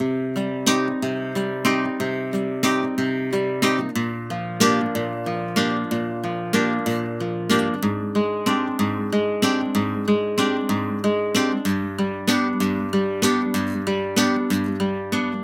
Nylon string guitar loop. This is part A of a 2 part loop.